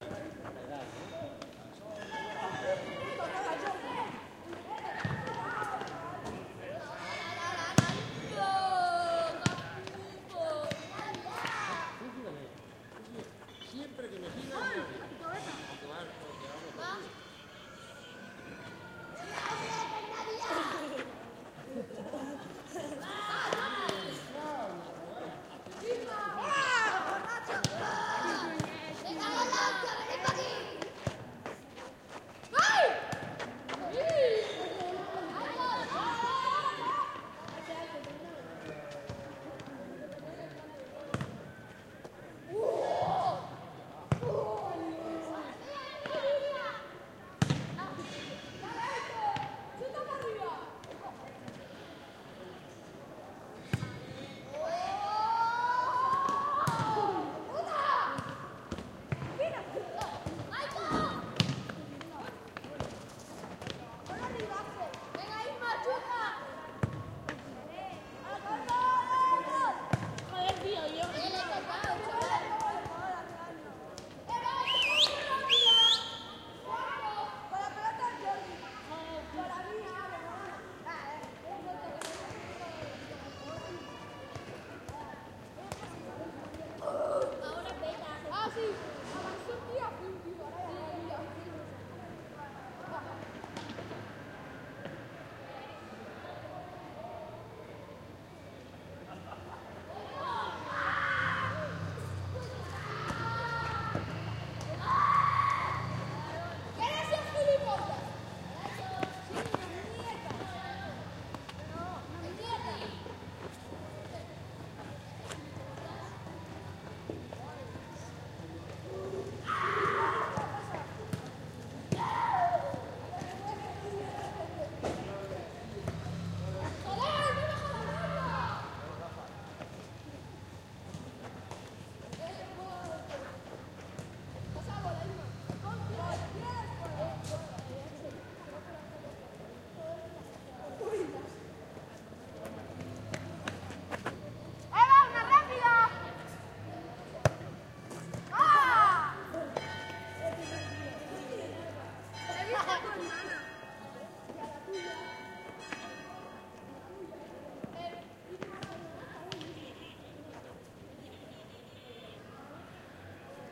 streetlife football 1
Children playing football in a little square (Barcelona). Recorded with MD Sony MZ-R30 & ECM-929LT microphone.
children, football, street